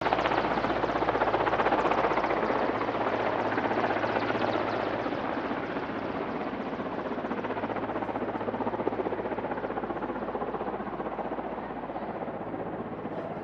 This is a passing by mexican army helicpter, recorded with a marantz
army, military, helicopter, choper